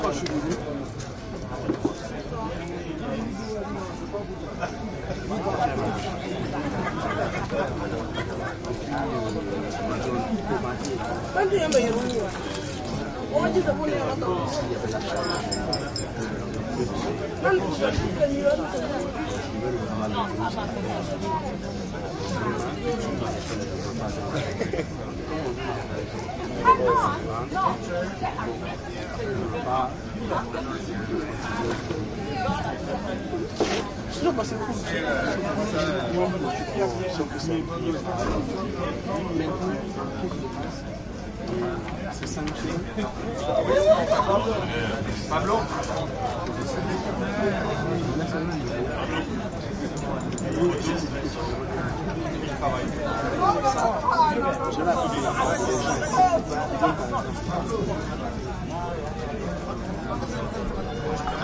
crowd int medium small bar with fridge bg Ouagadougou, Burkina Faso, Africa
Africa, crowd